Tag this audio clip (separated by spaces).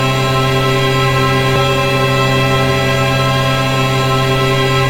Sound-Effect Perpetual Soundscape Still Freeze Atmospheric Background Everlasting